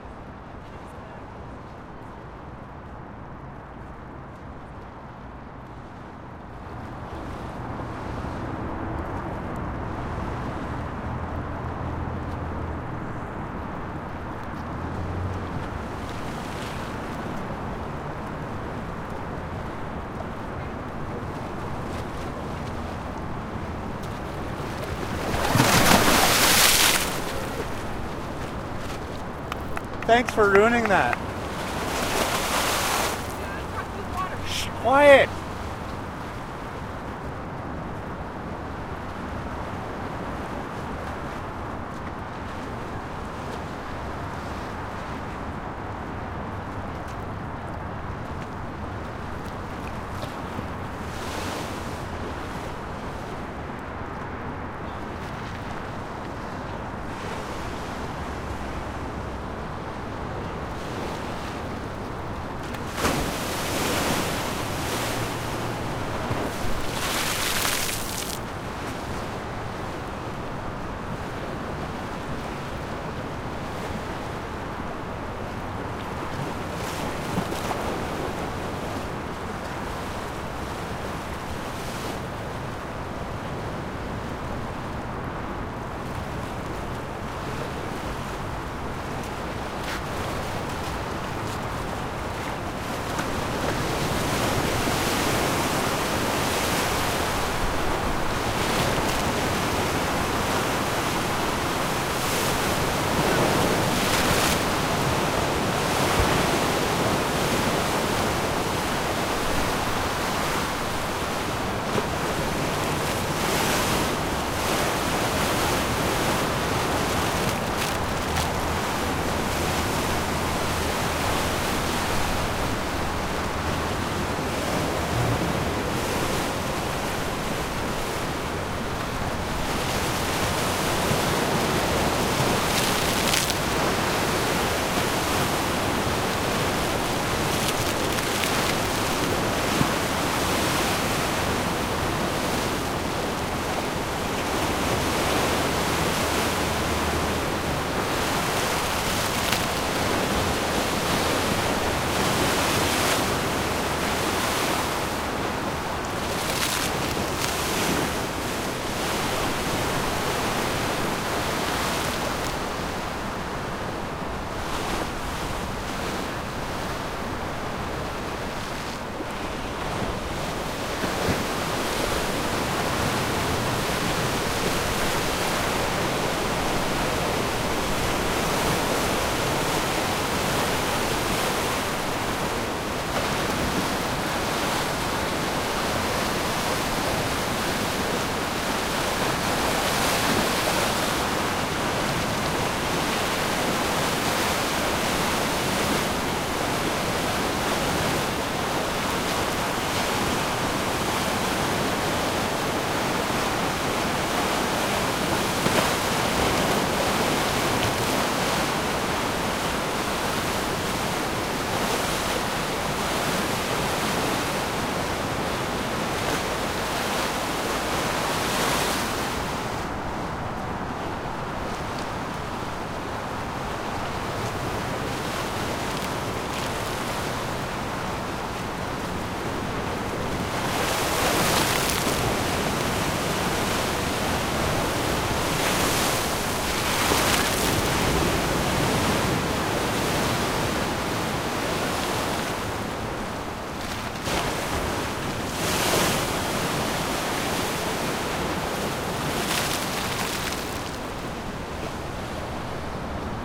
Waves Crashing Pier
Waves, Crashing